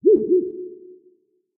Synthesized cuckoo sound